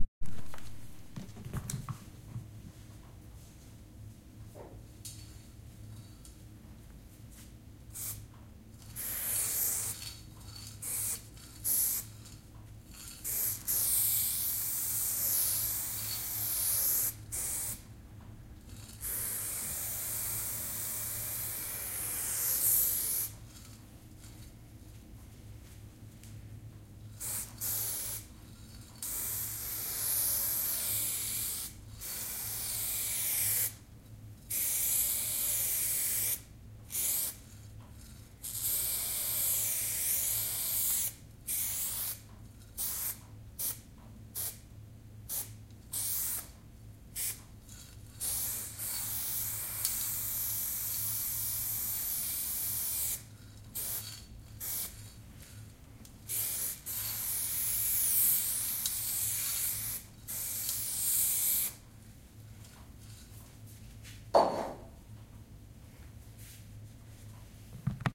spraying paint with a graffiti spraypaintcan
recorded with zoom H2N